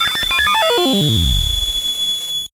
Digital glitch 054
Little beep mellody.
Created using a VST instrument called NoizDumpster, by The Lower Rhythm.
Might be useful as special effects on retro style games or in glitch music an similar genres.
You can find NoizDumpster here:
electronic, TheLowerRhythm, beeping, digital, glitch, harsh, lo-fi, NoizDumpster, noise, VST, computer, TLR, artificial, beep